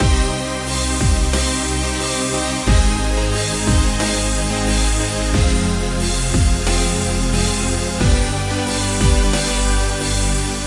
Heavy Dance Loop
Electronic dance synth thing. Loop was created by me with nothing but sequenced instruments within Logic Pro X.
groovy, song, electronic, heavy, electric, edm, dance, music, loop, epic, loops